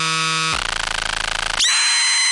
Pelicans Mic Broke
All sounds in this pack were made using a hand soldered synthesiser built in a workshop called DIRTY ELECTRONICS. The sounds are named as they are because there are 98 of them. They are all electronic, so sorry if "Budgie Flying Into The Sun" wasn't what you thought it was.
Make use of these sounds how you please, drop me message if you found any particularly useful and want to share what you created.
Enjoy.
16-bit, 16bit, 8-bit, 8bit, Beep, Beeping, chip, circuitry, computer, Digital, electronic, FM, Frequency, game, Modulation, robot, robotic, synth, synthesiser, synthesizer